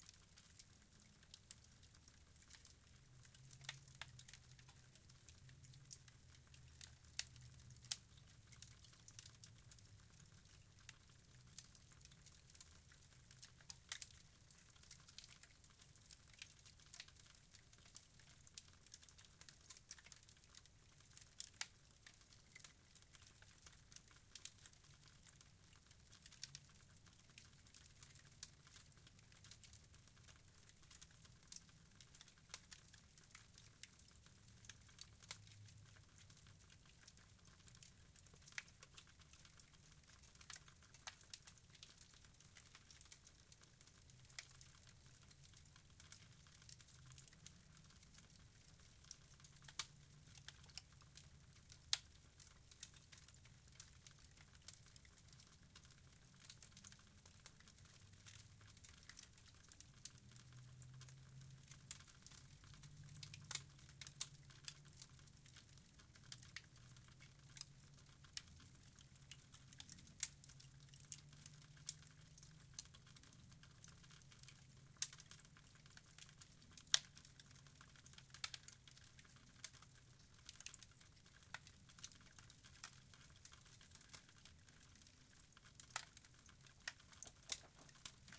Recorded: Tascam HD-P2 and Rode NT4.
You can hear train on the back side